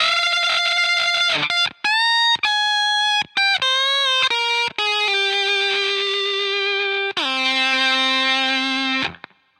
CTCC FUZZ 04
Guitar fuzz loops of improvised takes.
guitar, 100, fuzz, fm